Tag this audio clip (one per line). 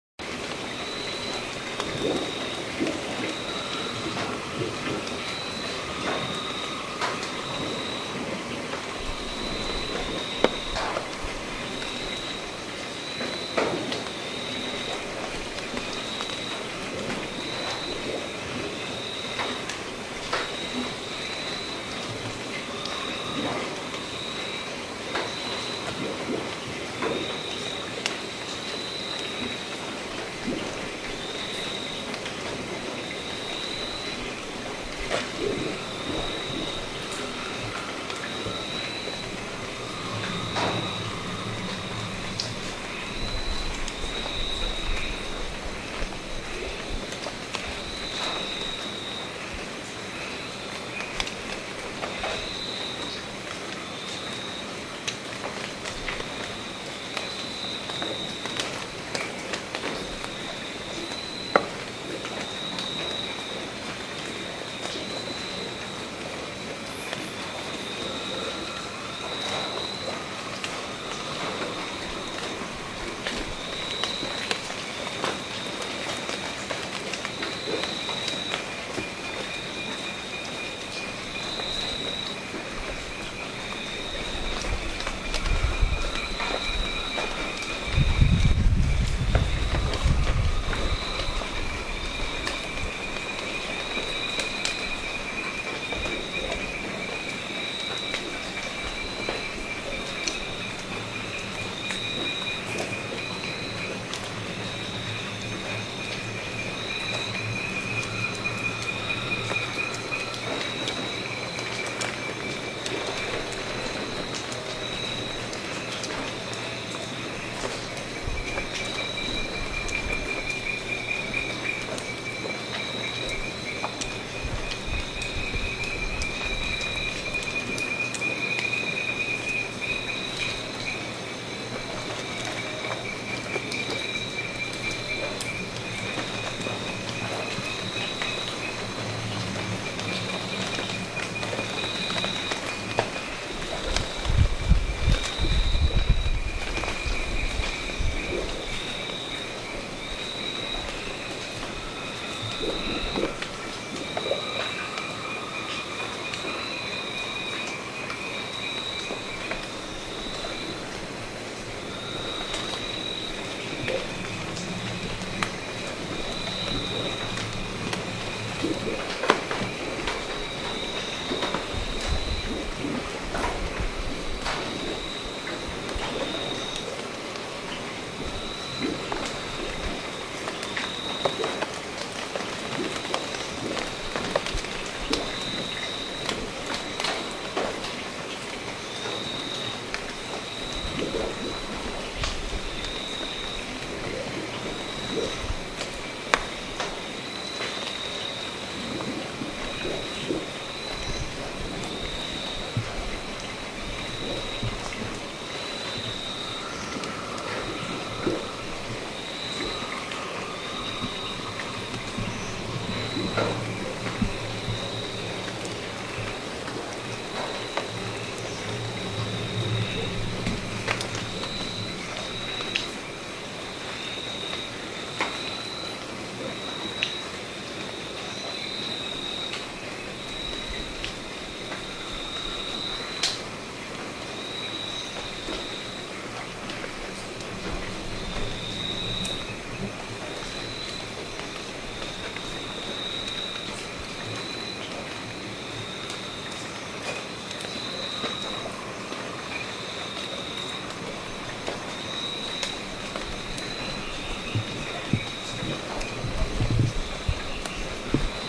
adapter
beachtek
chiayi
chirp
drizzly
dxa
field-recording
gh4
meishan
nighttime
ntg4plus
rainy
rodemic
summertime
taiwan
woods